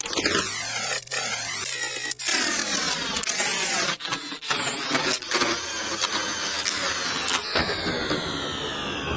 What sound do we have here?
CD Player mechanics recorded from 5CD charger Panasonic CD Stereo System SA-PM27. Edited speed and pitch on some parts of audio to gain robotic like mechanics sound.